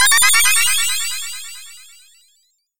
Sparkling Star 01
A star sparkling from far, far away. So far away in the vast universe.
This sound can for example be used in fantasy films, for example triggered when a star sparkles during night or when a fairy waves her magic wand - you name it!
If you enjoyed the sound, please STAR, COMMENT, SPREAD THE WORD!🗣 It really helps!